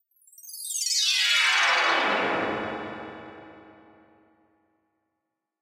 Alien windbells down
The sound of a spell forced upon an enemy by a magician. Falling pitch.
atonal, bomb, magic, bright, spell, stars, treble, stream, magician, bell, curse, teleport